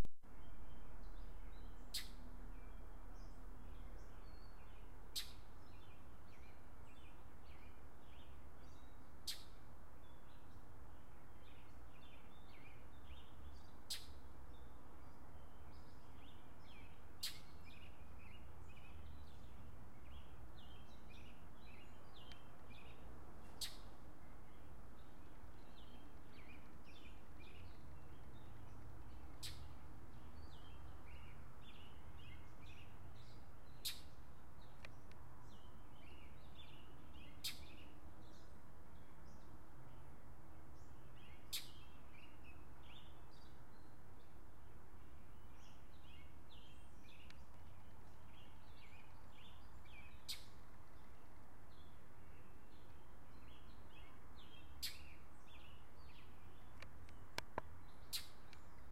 Birds, early morning, 5:30 a.m. in Baltimore, Maryland, eastern USA, in early May. The environment is mixed, with some concrete and brick walls, and some lawn and small trees. Time is 5:30 a.m.
spring, springtime, birds, early, city, urban, morning, suburban